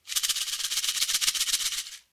Native Wooden Rain Stick Hit
Homemade Recording
Part of an original native Colombian percussion sampler.
Recorded with a Shure SM57 > Yamaha MG127cx > Mbox > Ableton Live